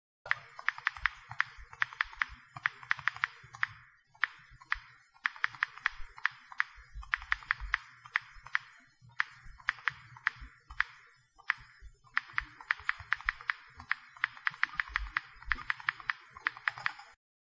Typing on an iphone